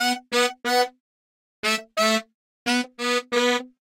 Modern Roots Reggae 14 090 Bmin A Samples
090, 14, A, Bmin, Modern, Reggae, Roots, Samples